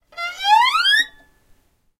Raw audio of a quick violin string glissando ascending. Recorded simultaneously with the Zoom H1, Zoom H4n Pro and Zoom H6 (XY Capsule) to compare the quality.
An example of how you might credit is by putting this in the description/credits:
The sound was recorded using a "H1 Zoom recorder" on 11th November 2017.